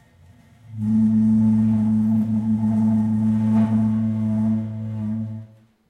Queneau Grince Chaise Table 05
frottement grincement d'une chaise sur le sol
desk, classroom, drag, table, floor, chair